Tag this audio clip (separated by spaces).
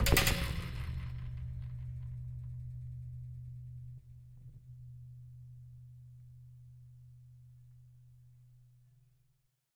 acoustic collide collision metalic percussive spring wood